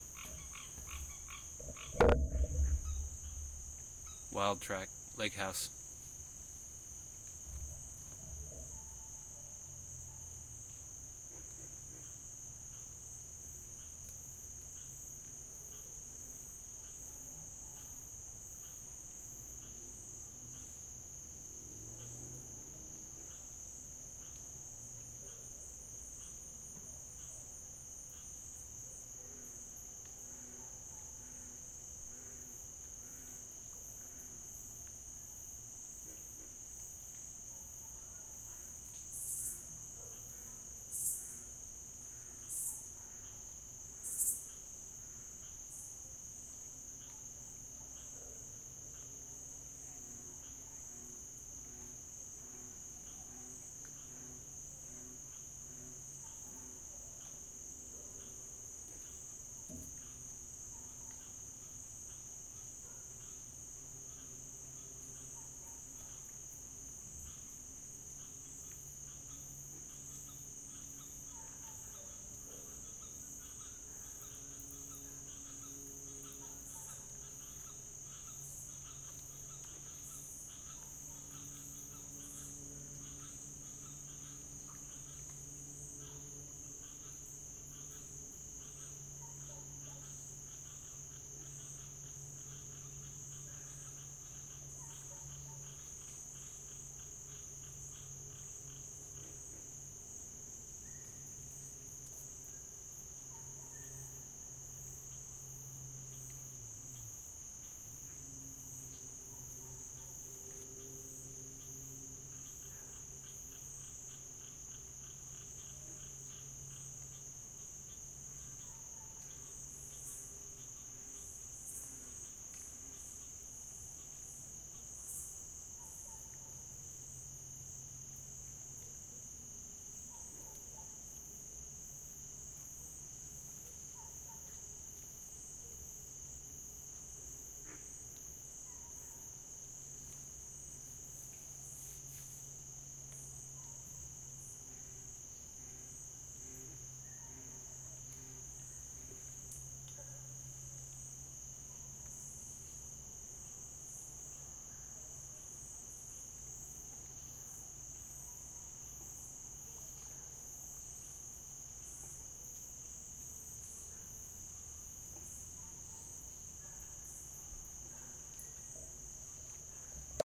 Lake at night 1
Swampy lake sounds at night, with crickets and frogs.
I've used this site a lot, wanted to give something back!
ambiance, ambient, crickets, field-recording, frogs, insects, lake, nature, night, summer, swamp